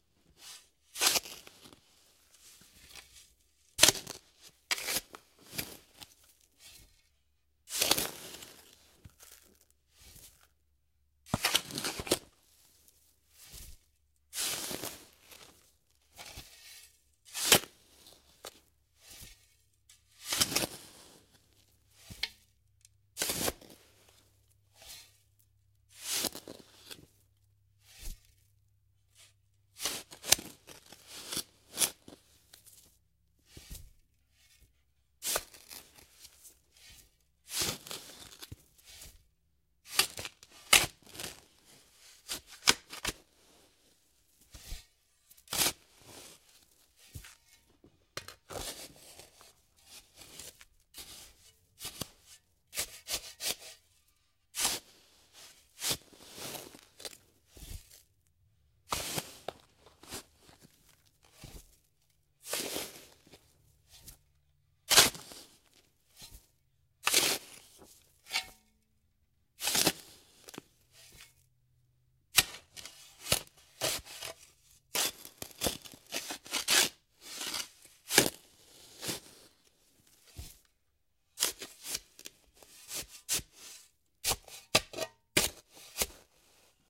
Natural Sandy Soil Dirt Spade Shovel Digging Scraping
Shovel digging around in sandy dirt. Great for grave digging scenes. Also includes some falling dirt sounds.
sfx, soundeffects, sound, fx